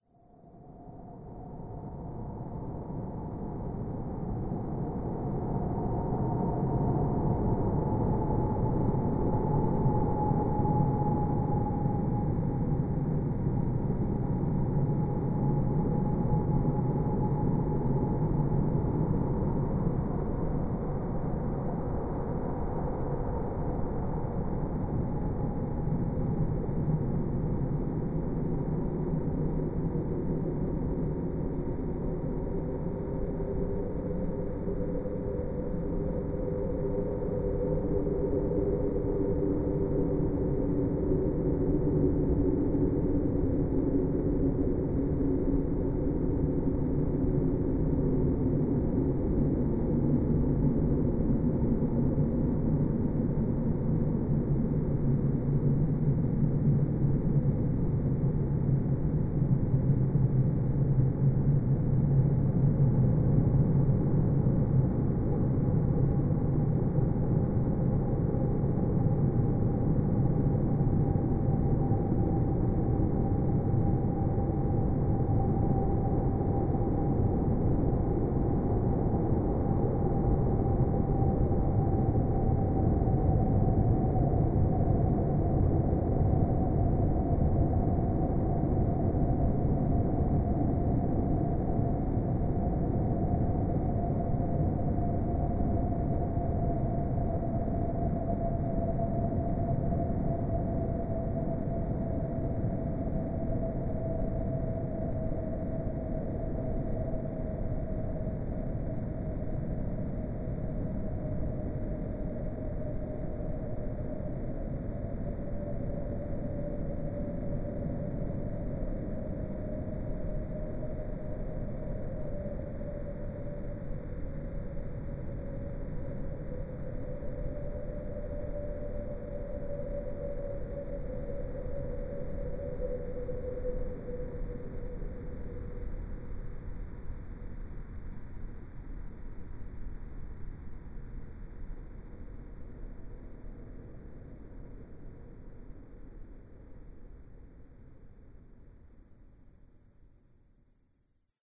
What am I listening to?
Sounds like space ambience/a sci-fi space station. Did this today while messing around in Audacity. This sound was created by blowing into a microphone while slowly changing pitches. Afterwards I slowed down the sound, added the Baseboost filter, and lowered the pitch of the sound.
It literally took me 2 minutes to make.
ambient, galaxy, out, ship, space, stars, world